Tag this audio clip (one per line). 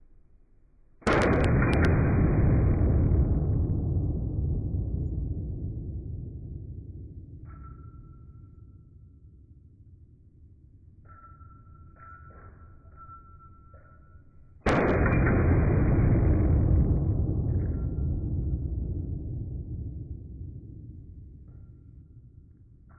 shockwave
Beretta
shoot
slow-motion
bullet
reload
gun
slow-motion-gunshot
Beretta-92
shot
click-clack
automatic
boom
firing
reloading
pistol
explosion
bang
gunshot
cock
92fs
Military
M9
shooting
weapon
slow